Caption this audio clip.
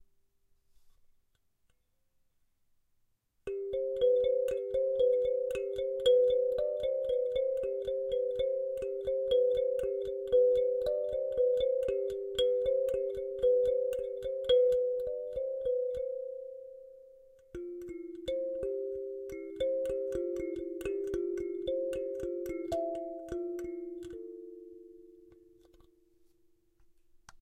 Kalimba melody 2
Kalimba original melody
kalimba, melody, thumb-piano